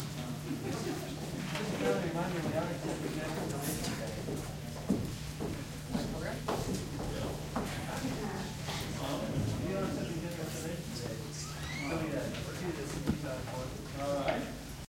pre-show chatter
An audience engaged in muted conversation before a theater performance. Somebody whispers "It's starting," but the crowd doesn't pipe down.
Recorded with a GL2 internal microphone.
Requested here: